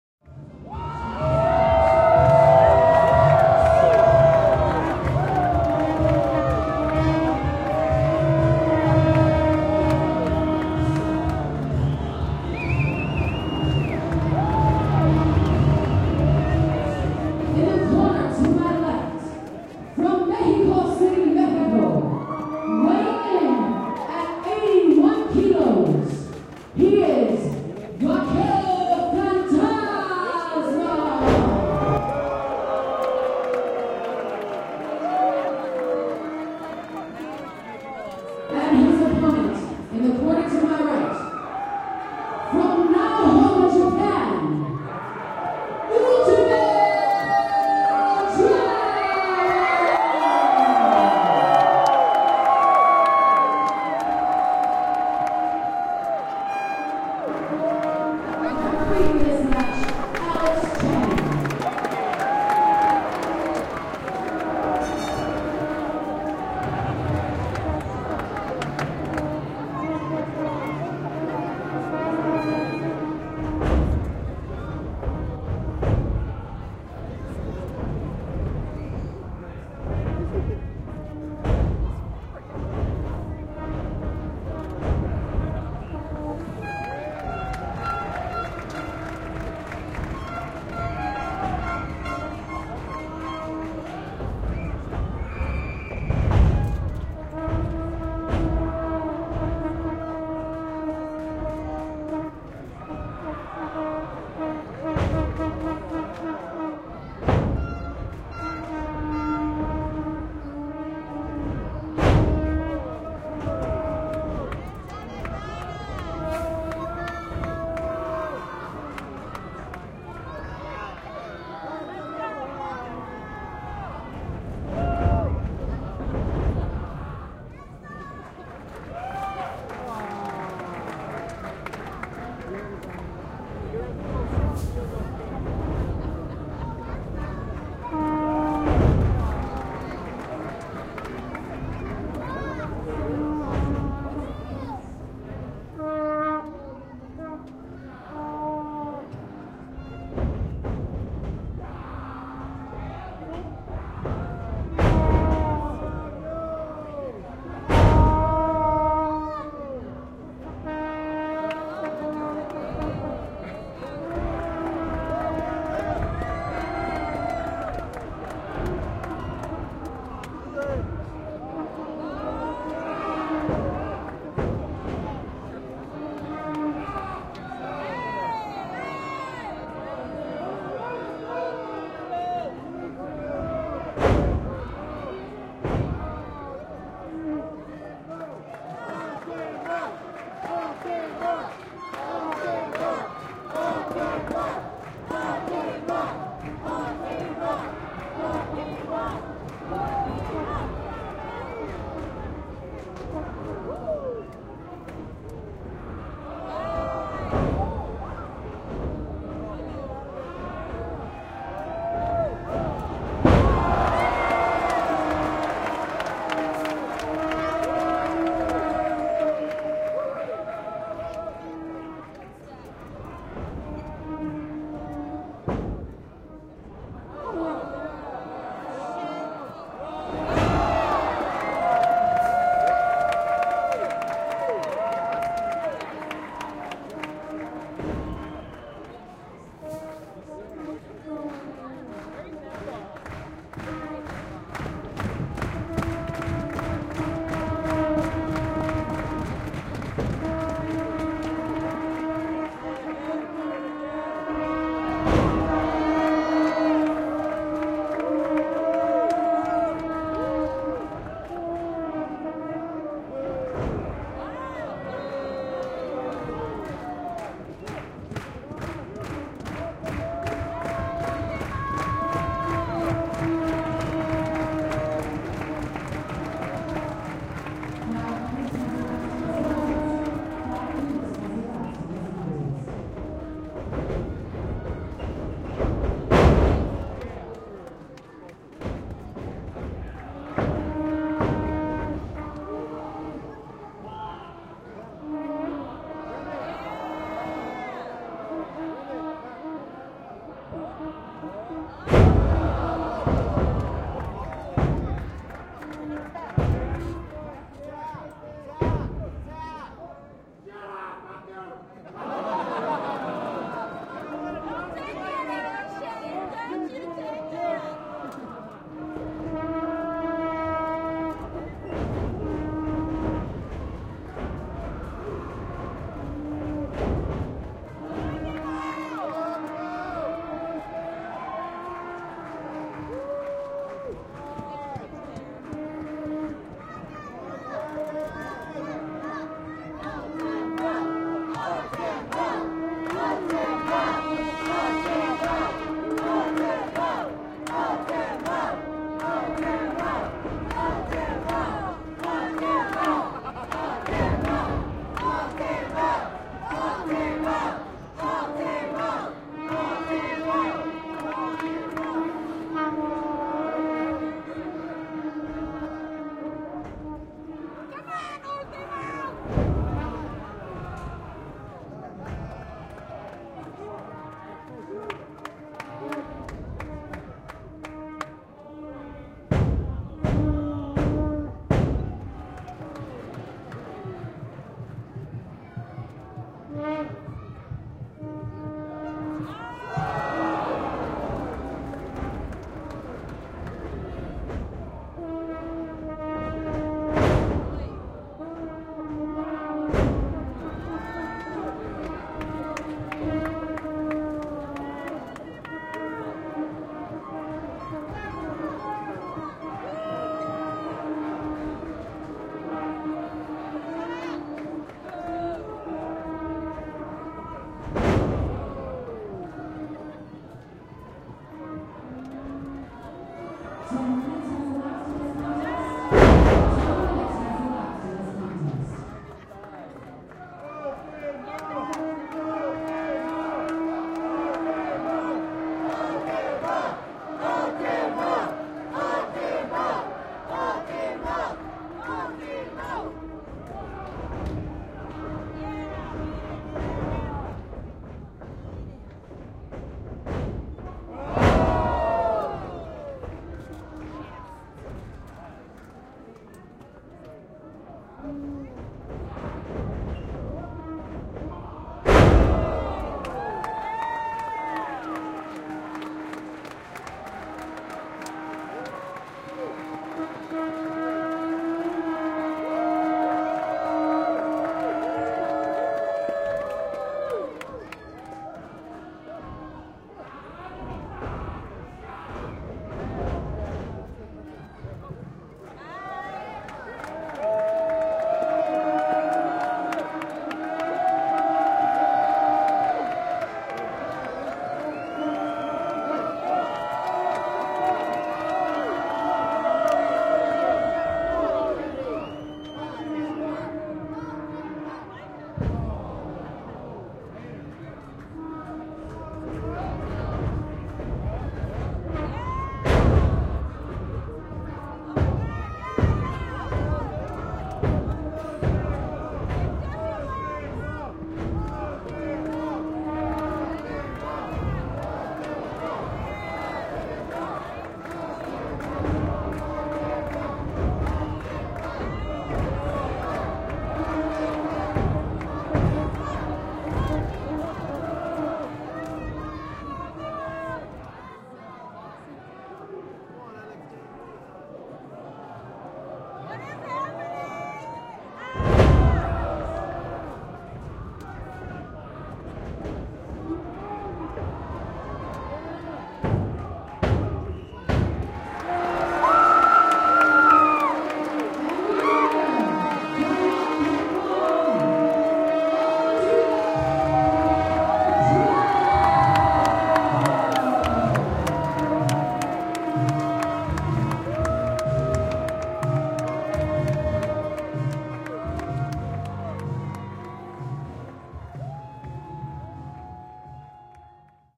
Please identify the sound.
Lucha Libre, Mexican Wrestling, Mission District, San Francisco